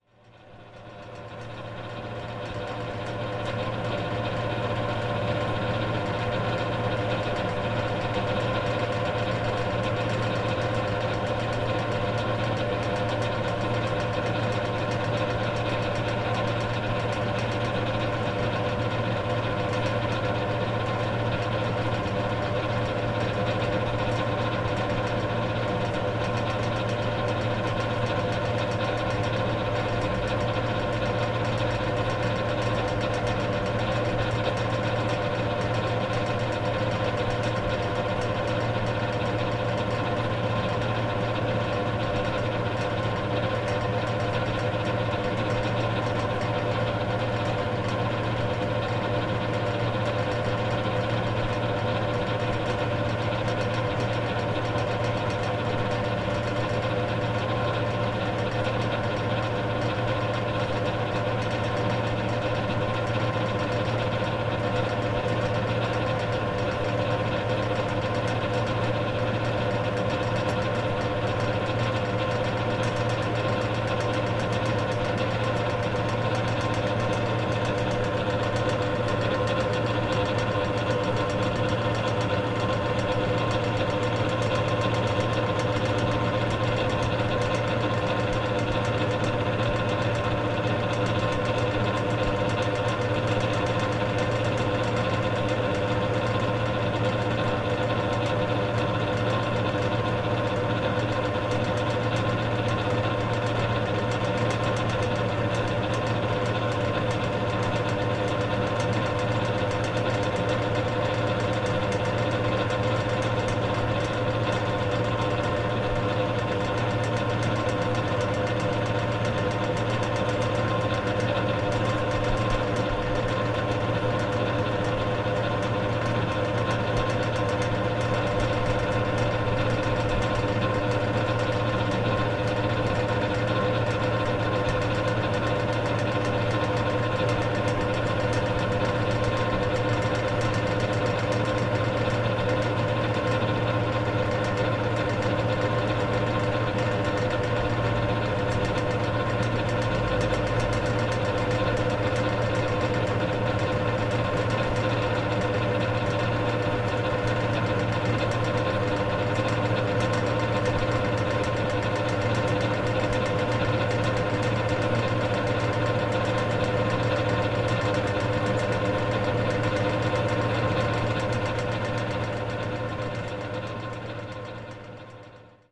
Small construction fan 20130424
A small (noisy) construction fan placed in my basement recorded with a Zoom H2. There is a 5s fade in and a 5s fade out.
noise, fan, construction